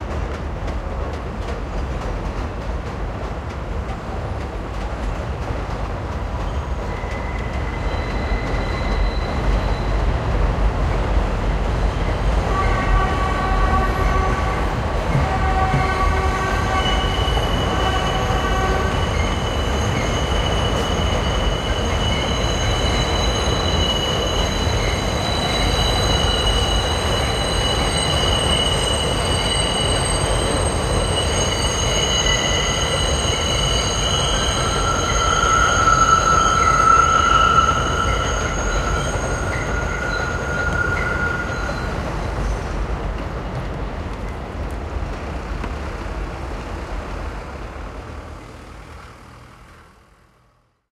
DR000062+12dB-40Hz-Subsonic
Field recording of elevated subway train in Queens. The N and W trains take a sharp turn here, causing the wheels to squeal. Used roll-off at 40 Hz to save my speakers. I used a windscreen to cut down on wind noise which can otherwise be a problem on this recorder.
elevated, queens, rumbling, screeching, subway, tracks, train